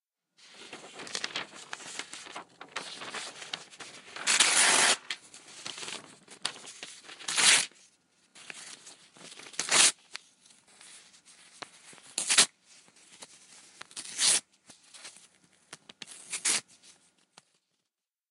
tearing paper
Tearing up a piece of paper
paper, rip, ripping, ripping-paper, tear, tearing, tearing-paper, tear-paper